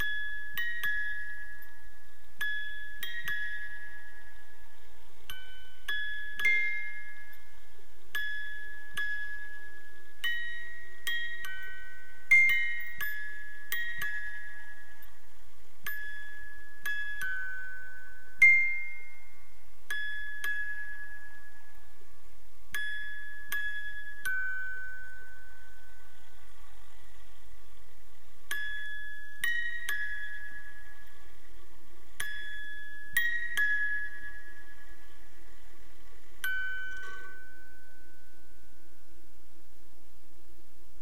About 40 seconds from the very end of a wind-up music box's cycle, so the notes are quite slow and the gears are at their most audible.